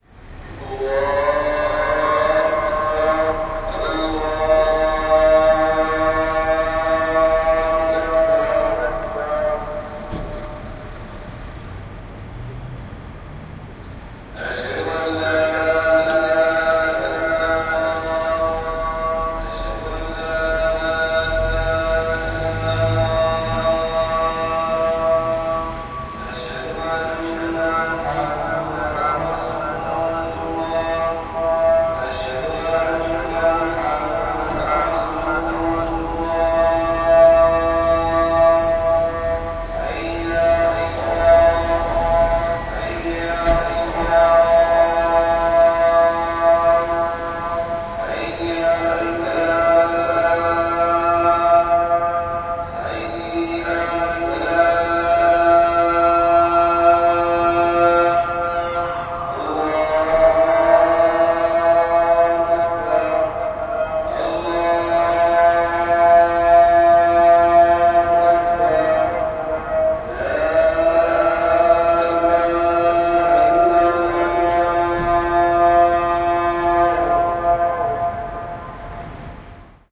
Tangier-call to prayer
Typical friday's call to prayer (extract) among a quite urban ambient. The natural delay effect is generated by the sound bouncing over the buildings walls.
Zoom H2
Tangier
january 2011
Morocco, prayer, street